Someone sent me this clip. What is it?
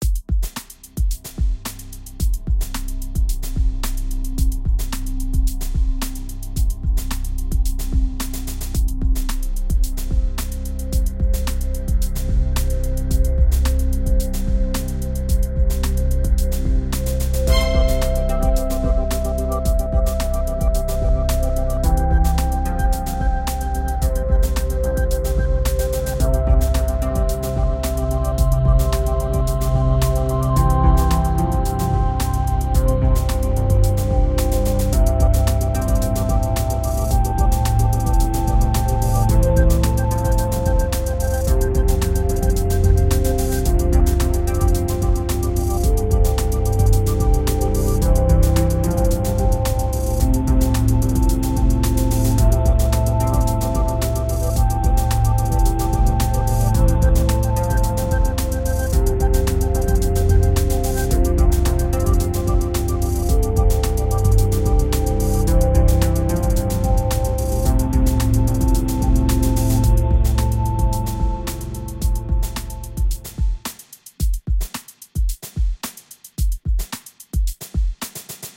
Kawaii Logo
Playful, silly and lightweight loopable electronic track with non-intrusive sounds.
ambient, animation, anime, cartoon, cute, dance, edm, electronic, electronica, funny, happy, intro, kawaii, logo, loop, music, outro, playful, positive, relaxing, silly, soundtrack, sweet, underscore, vlog